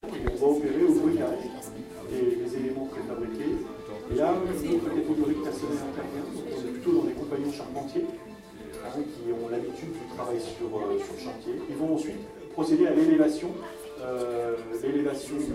session 3 LBFR Amélie & Bryan [3]
Here are the recordings after a hunting sounds made in all the school. Trying to find the source of the sound, the place where it was recorded...
labinquenais, rennes